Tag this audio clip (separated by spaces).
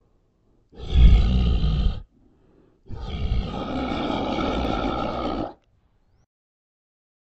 beast growl horror